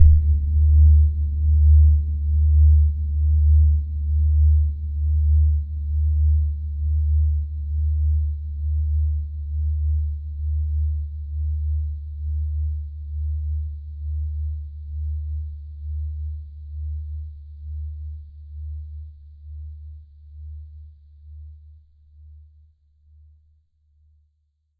A low-pitched ringing sound made via a high-pitched asian bell with a clear ringing tone, struck with a wooden dowel, the audio then subjected to a sequence of pitch-changes downward, each combined with a flanger-type effect at various settings for rate, depth and regeneration.
hit, metal